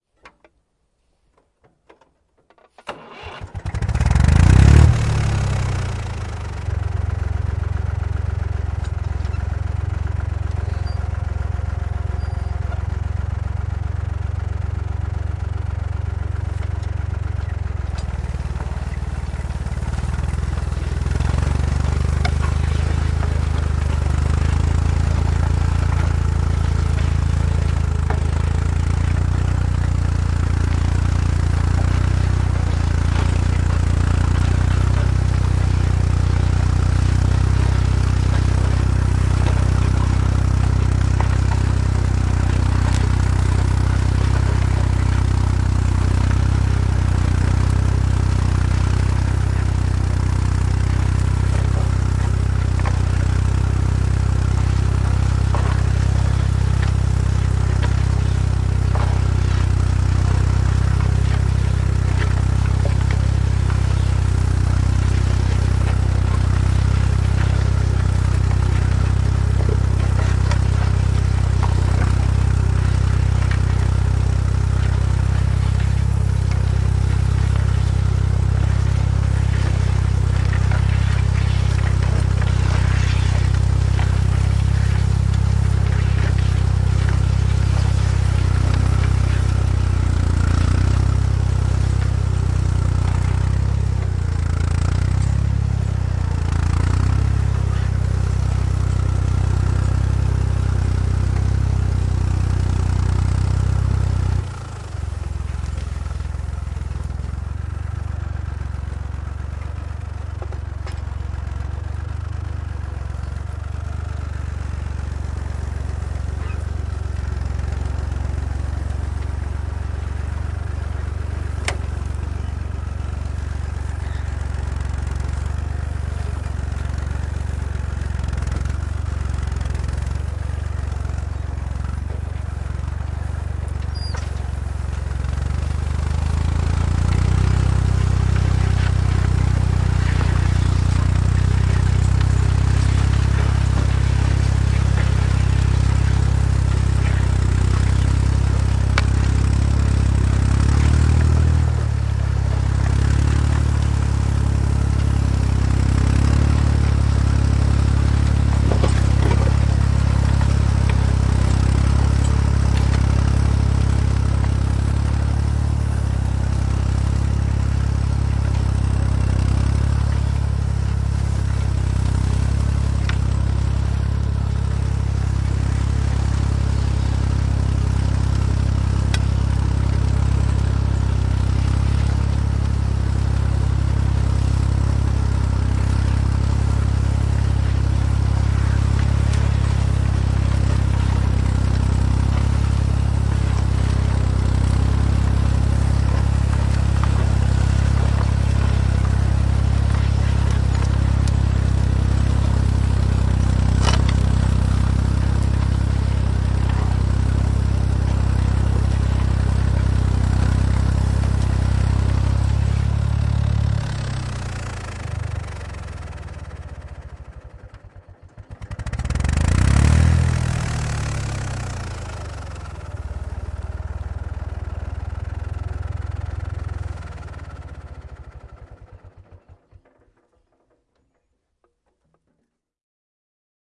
Vanha traktori kyntää pellolla / Old tractor ploughing on the field, Fordson Super Dexxta, a 1963 model
Fordson Super Dexxta, vm 1963. Käynnistys, kynnetään peltoa, aura kuuluu, moottori sammuu, lähiääni.
Paikka/Place: Suomi / Finland / Vihti, Jokikunta
Aika/Date: 19.10.1993
Finland, Maatalous, Yleisradio, Suomi, Soundfx, Field-Recording, Plough, Finnish-Broadcasting-Company, Maanviljely, Yle, Tehosteet, Agriculture